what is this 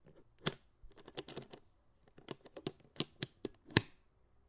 opening hard make up case
Opening a metal clip on a hard carry makeup case.
clip, cacophonous, carry-case, close, hard, metal, open, case, OWI, closing, opening